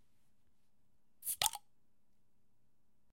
Beer Bottle Opening
Bottle of beer being opened with a bottle cap opener.